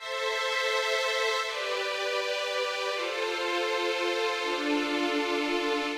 Background Strings 2
Backing strings used in the song Anthem 2007 by my band WaveSounds.